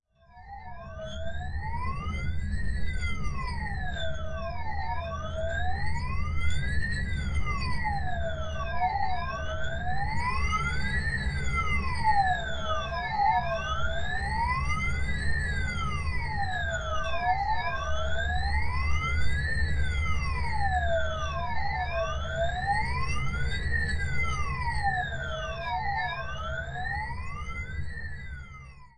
Whirling Sound
Heavily processed VST sounds using various reverbs, phasers and filters.
Aliens, Laser, Phaser, Space, Spaceship, Warp, Whirl